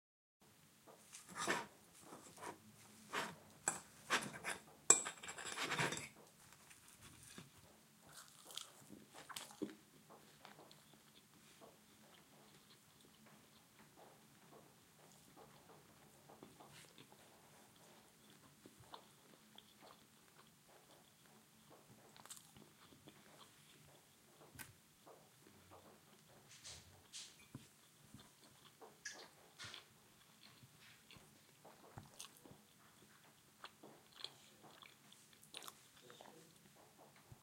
Morning Eating in the kitchen